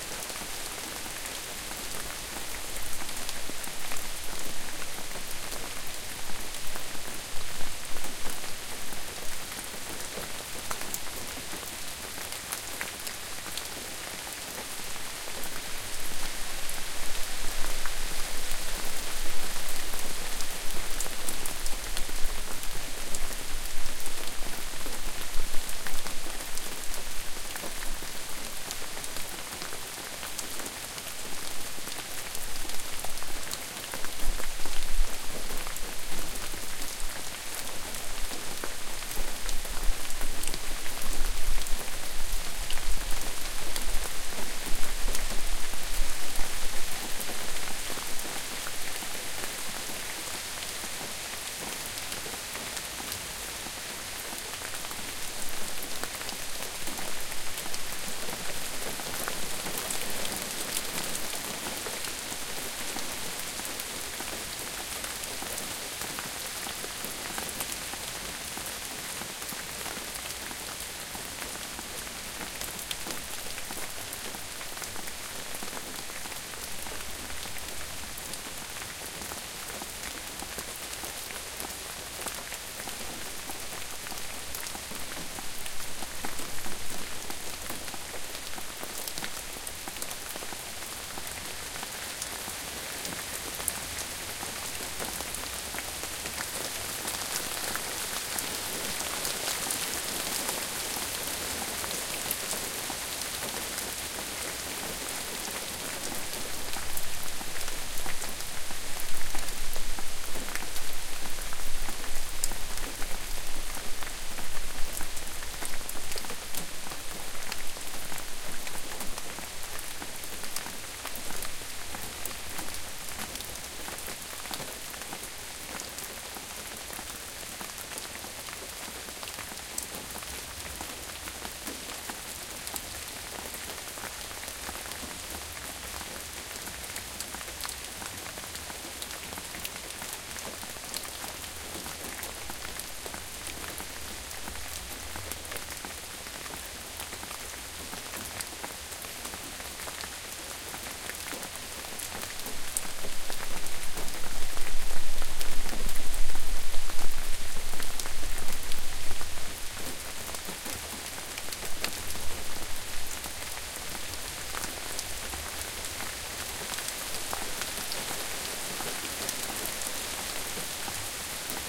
gentle rainfall
Rain gently falling in the woods, recorded 2017 Oct 19, Fitzwilliam, New Hampshire, USA, with a Tascam DR-40's built-in mics. Increases and decreases in intensity. Can be looped. I have not altered or colored the sound in any way, leaving that to anyone who cares to use it.
weather, rural-ambience, rainfall, nature, rain